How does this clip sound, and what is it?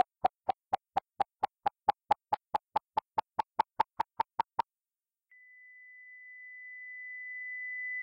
LAABI Rami 2014 2015 heartFailure
HOW I DID IT?
Generate click track (60 bpm, individual click duration 3 ms, 80 Midi pitch of strong and weak clicks)
Duplicate on another track with a start time offset of 0.50 seconds.
Mix and render
Apply effects: click supression and progressive variation of pitch (30% increase in tempo)
Generate silence (0.5 seconds)
Generate risset drum (2000 hz, 6 seconds decay)
Apply effects: Reverse direction
HOW CAN I DESCRIBE IT? (French)----------------------
// Typologie (Cf. Pierre Schaeffer) :
N" (Itération tonique) + V (continu Varié)
// Morphologie (Cf. Pierre Schaeffer) :
1- Masse:
- Son "cannelé"
2- Timbre harmonique:
Froid
3- Grain:
Son lisse, grain fin.
4- Allure:
Absence de vibrato
5- Dynamique :
Attaque graduelle et progressive
6- Profil mélodique:
Variations scalaires puis variations serpentines
7- Profil de masse
Site :
Strate unique. Son quasiment à la même hauteur.
Calibre :
Amplification des basses pour la deuxième partie du son.
artificial, beep, beeping, death, electronic, failure, heart, monitor, rate